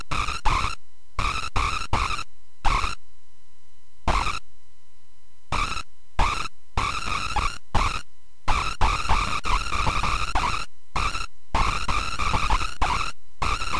Circuit bending recordings with a toy keyboard.
noise, circuit-bent